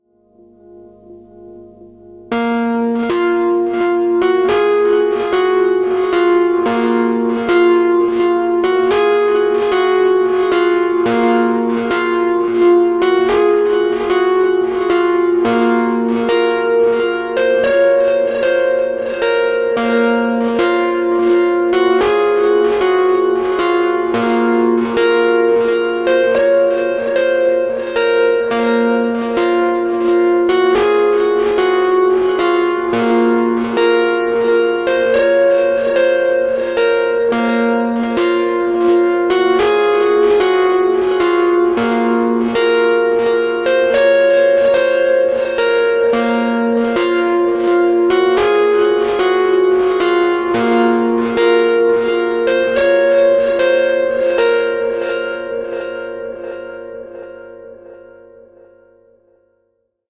s dist epiano phrase appr110bpm
Several repetitions of single phrase played on my Casio synth.
Tempo is approx. 110 bpm.
distorted, distortion, echo, epiano, melody, piano, violent